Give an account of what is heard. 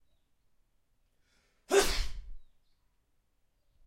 Organic Male Sneeze Adult
organic, OWI, male, Sneeze, Sfx, Human